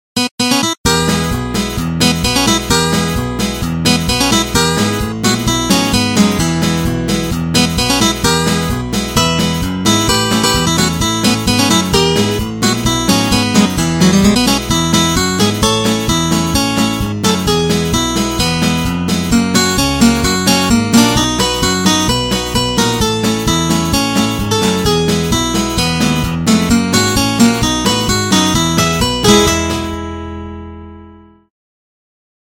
Virtual Steel String Acoustic Guitar VST: Steel Guitar Rag (Leon McAuliffe)
Audio Sample: Steel Guitar Rag (Leon McAuliffe) made with GuitarTempus testing the Virtual Steel String Acoustic Guitar
Virtual-Guitar, Nylon-String-Guitar-VST, Semi-Acoustic-Guitar, Steel-String-Guitar-VST, Twelve-Steel-String, MIDI-Guitar, Guitar-Synth, Guitar-Software